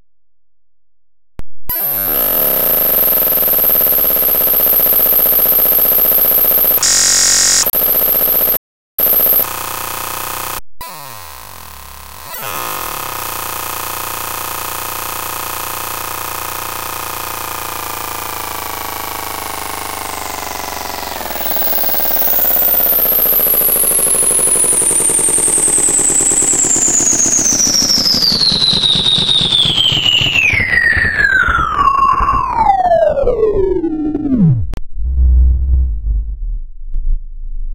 Box of nails